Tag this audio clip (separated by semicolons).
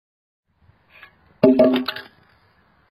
stone; 2x4; drop; floor; wood